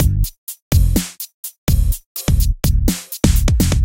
On Rd loop 2
Can be used with On Rd loop 1 to create a simple but decent drum beat.
8-bar, drum, hip, hop, loop, on-road